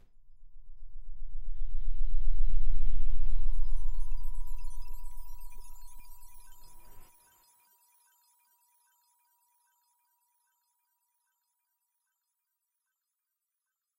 I combined some sounds I found here to make a subtle logo intro for something sci-fi or technology related